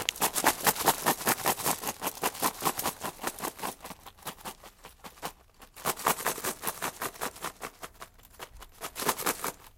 Here are the sounds recorded from various objects.
lapoterie, france, kit, mysounds, rennes